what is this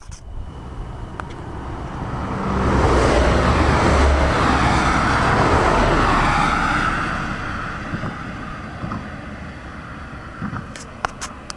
recorded from a bridge